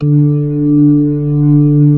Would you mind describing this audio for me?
real organ slow rotary
b3, organ, tonewheel